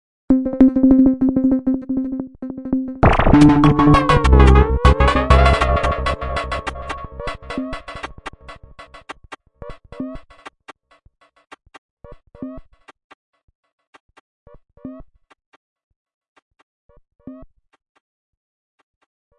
20140316 attackloop 120BPM 4 4 Analog 1 Kit ConstructionKit WeirdEffectsRhythmic4
This loop is an element form the mixdown sample proposals 20140316_attackloop_120BPM_4/4_Analog_1_Kit_ConstructionKit_mixdown1 and 20140316_attackloop_120BPM_4/4_Analog_1_Kit_ConstructionKit_mixdown2. It is a weird electronid effects loog which was created with the Waldorf Attack VST Drum Synth. The kit used was Analog 1 Kit and the loop was created using Cubase 7.5. Various processing tools were used to create some variations as walle as mastering using iZotope Ozone 5.
weird, electro, electronic, dance, 120BPM, rhythmic, ConstructionKit, sci-fi, loop